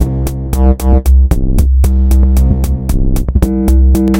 Attack loop 114 bpm-16
It is a one measure 4/4 drumloop at 114 bpm, created with the Waldorf Attack VSTi within Cubase SX.
The loop has a low tempo more experimental electro feel with some
expressive bass sounds, most of them having a pitch of C. The drumloop
for loops 10 till 19 is always the same. The variation is in the bass.
Loops 18 and 19 contain the drums only, where 09 is the most stripped
version of the two. Mastering (EQ, Stereo Enhancer, Multi-Band expand/compress/limit, dither, fades at start and/or end) done within Wavelab.
114-bpm, bass, drumloop